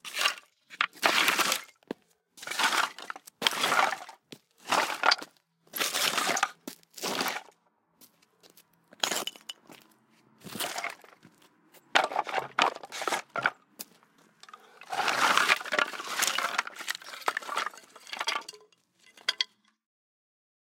SFX wood stone floor scraping pile
SFX, wood, fall, pile, bunch, stone floor, drop, falling, hit, impact, scraping
pile, drop, hit, floor, falling, impact, stone, SFX, wood, bunch, scraping, fall